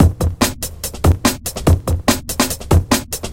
Hardbass
Hardstyle
Loops
140 BPM
Hardbass, Hardstyle, Loops